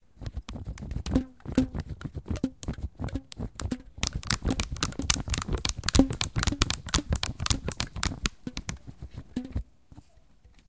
soundscape SGFR maxence et miguel
first soundscape made by pupils from Saint-Guinoux
saint-guinoux, soundscape, cityrings, france